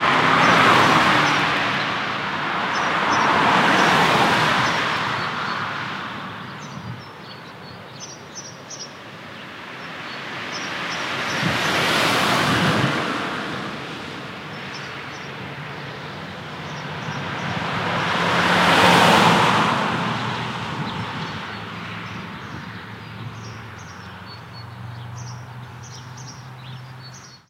Sound of some passing cars after each other. Recorded with a Behringer ECM8000 lineair omni mic.